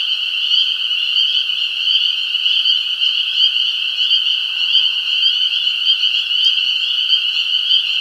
Frogs and other sounds of spring at a creek in Pisgah National Forest at Bent Creek NC beside Lake Powhatan at the bridge, This file was recorded with a Fujifilm XT120 camera. I trimmed it at zero crossings to enable looping peak is minus 1 db, -1db. I hope you can find a use for it.
For the love of music